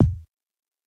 House Kick
One shot recorded from the Yamaha PSS-51 Keyboard's House Kit.
Recorded with an Audient ID22 audio interface with no other processing.
drum, kit, yamaha, percs, drums, hit, one-shot, pss-51, lofi, percussion, recording, kick, sample